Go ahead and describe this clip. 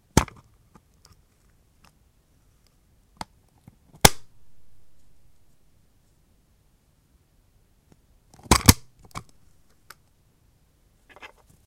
wuc front glass vry close open close open
Opening, closing and clumsily opening the front glass of a wind-up clock. Manual system.
close-up, wind-up